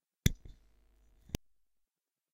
I recorded my microphone when turning it on and then shortly afterwards turning it off.